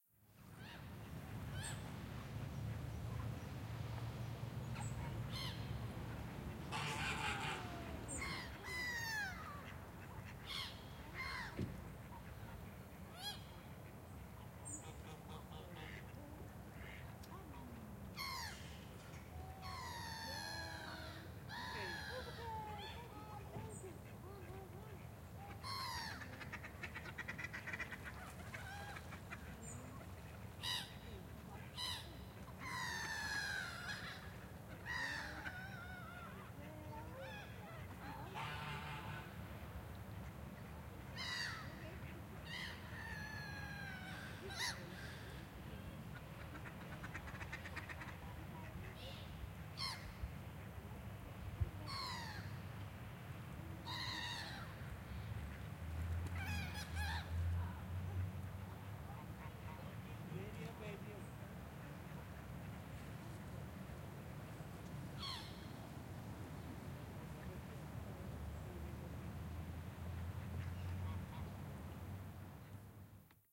Park Zeist eendjes voeren walla birds november 2010
Zoom H4n X/Y stereo field-recording in Zeist, the Netherlands. General ambiance of park, residential.
ambiance, ambience, ambient, atmo, atmos, atmosphere, background, background-sound, birds, children, ducks, dutch, field-recording, general-noise, holland, netherlands, park, soundscape, walla, zeist